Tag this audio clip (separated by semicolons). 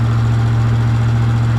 Car; Engine; Motor; Truck